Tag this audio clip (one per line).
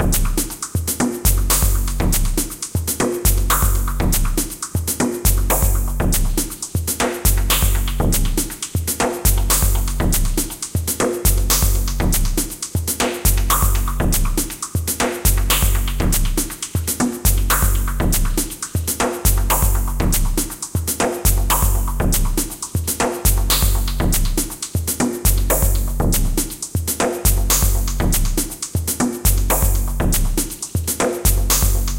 beat
electro
loop
noise